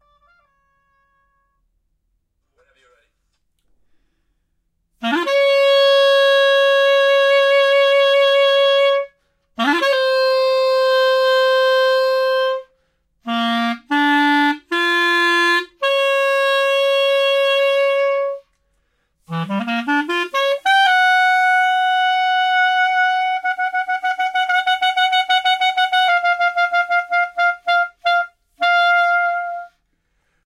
ORTF Clarinet#01
ORTF stereo microphone positioning of clarinet.
clarinet, jazz, microphone, ortf, stereo